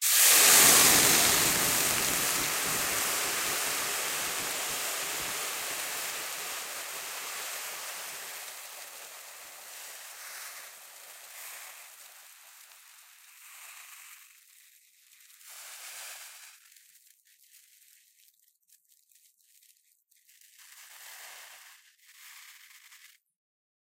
The sound of water being poured onto a pre-heated frying pan, creating a intense sizzling noise.
Recorded using the Zoom H6 XY module.